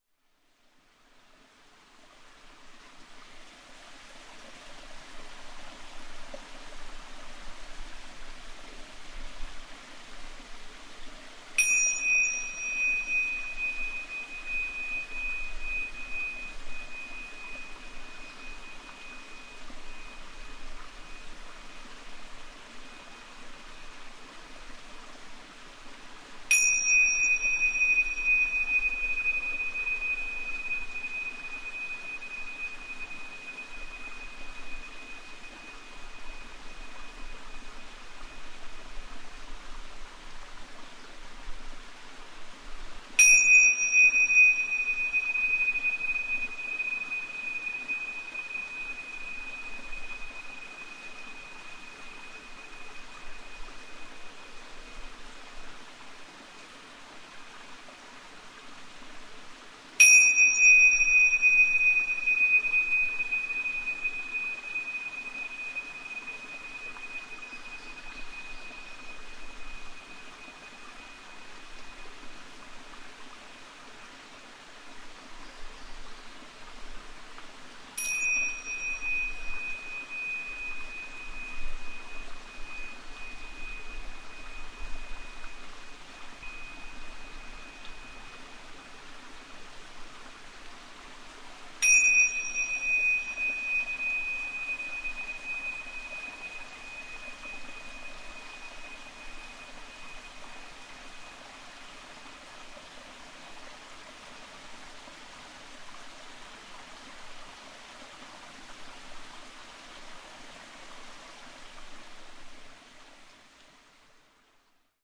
Tingsha under vaulted stone bridge mountain stream 19jul2008
Tibetan tingsha bells rung under vaulted stone bridge on mountain stream in Blueridge mountains on North Carolina South Carolina border. Poinsett Bridge, stone bridge built 1825 of carved stone over a mountain stream.
N 35° 07.758 W 082° 23.046
17S E 373893 N 3888258
Rode NT4 , Fostex FR2-LE
bells, dingsha, dingshaw, mountain, stone-bridge, stream, tibetan, tingsha